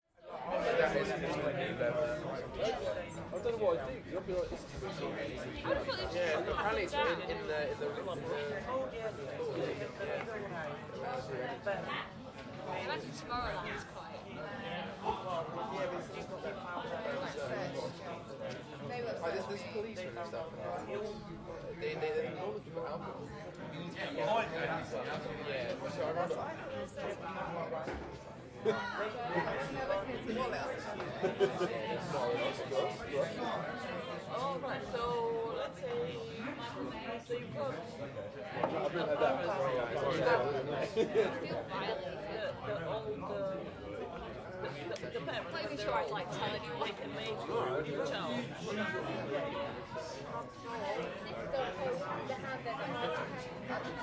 Recording of a beer garden full of punters.
Equipment used: Zoom H4
Location: The Empress, Cambridge, UK
Date: 05/06/15